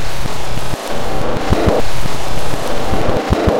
similar name to darkwash01 but totally different sound (though similiar vibe); dark and rhythmic loop; made in Adobe Audition